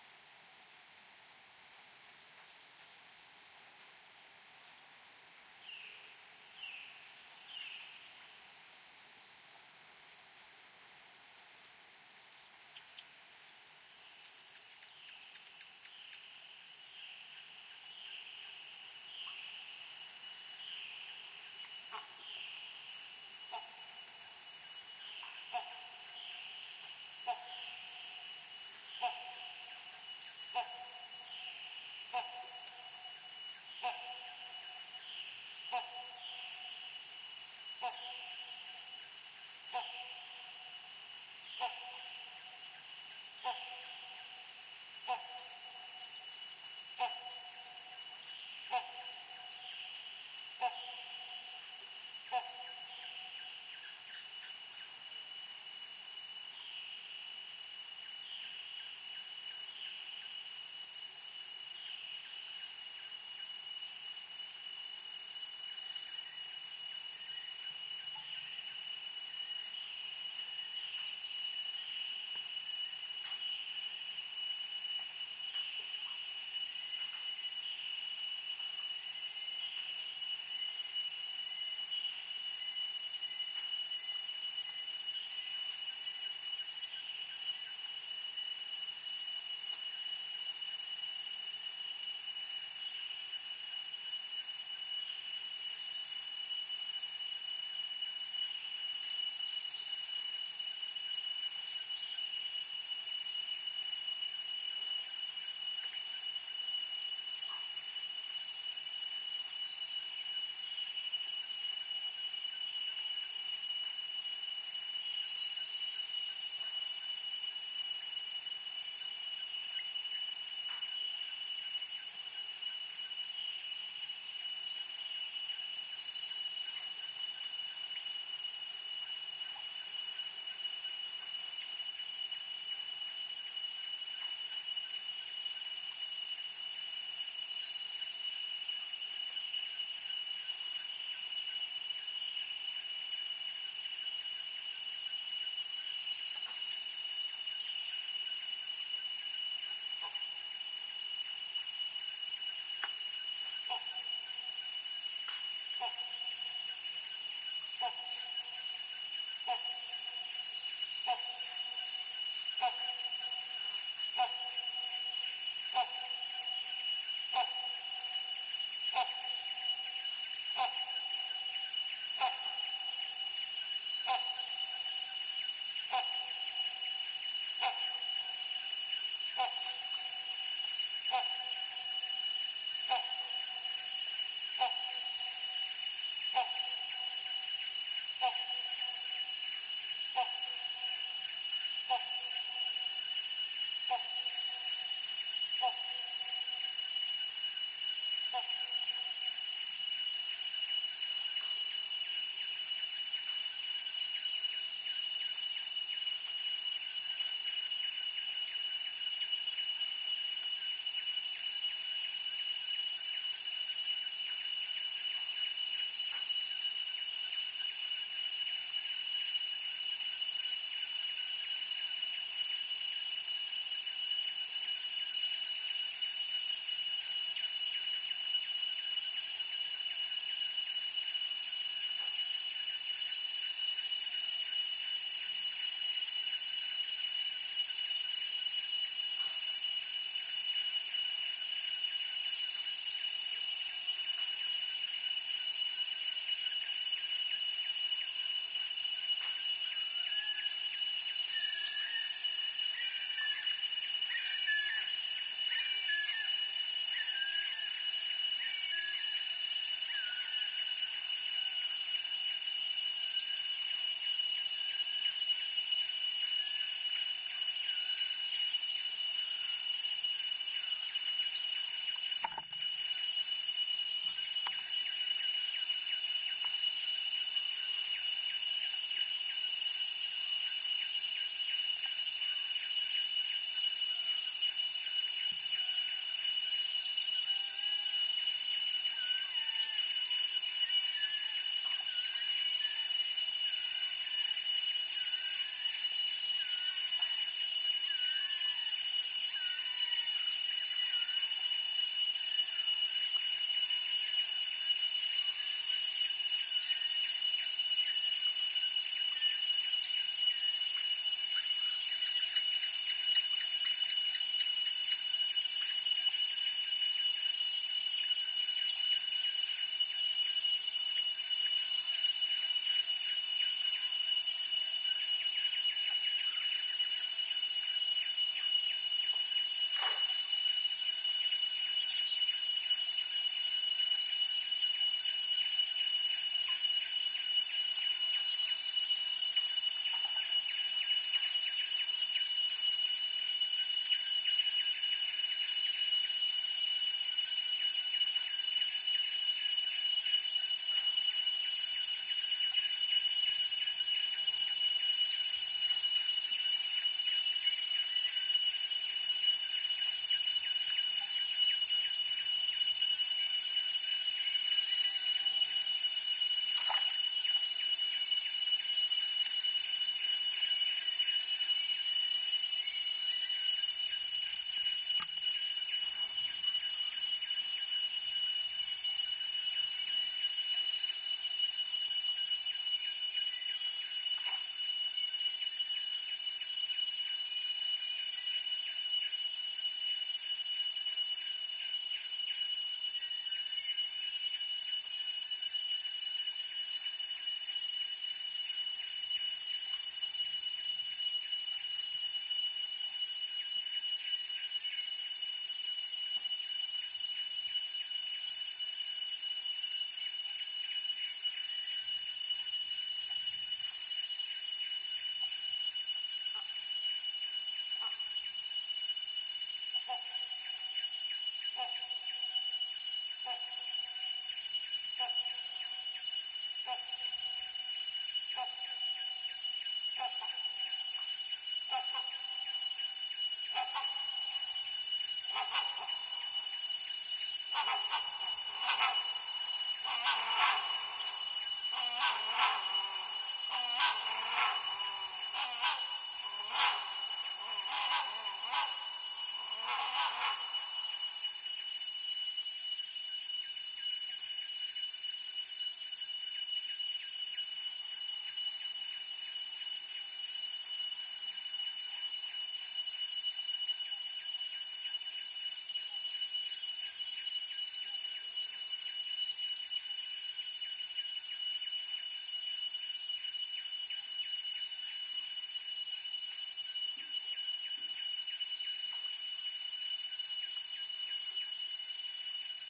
Thailand jungle morning waking up part2 crickets rise and peak +hornbills
Thailand jungle morning waking up part crickets rise and peak +hornbills
birds, crickets, field-recording, jungle, morning, Thailand, up, waking